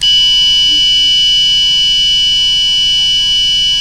Quick Tune electronic guitar tuner made in china recorded with a cheap Radio Shack clipon condenser mic. Low E.